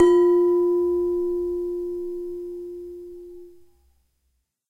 gamelan jawa indonesia demung
demung, gamelan, indonesia, jawa